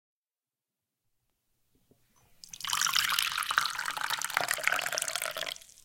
A long coffee pour into an espresso cup from a french press. Recorded in high quality sound via a Rode NTG2 for a short film.
coffee, pour, pouring, espresso, cup